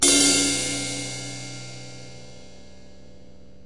This was hit a little hard on my 17" ride.